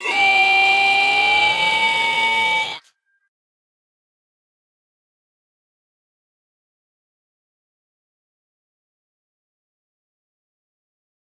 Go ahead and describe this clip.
1. of 4 Monster Screams (Dry and with Reverb)
Monster Scream 1 DRY